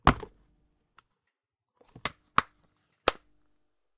Opening a small metal box 1
Opening a small plastic box.
{"fr":"Ouvrir une petite boîte en plastique 1","desc":"Ouverture d'une petite boîte en plastique.","tags":"ouvrir ouverture plastique boite manipuler fermer"}
box, close, manipulation, open, opening, small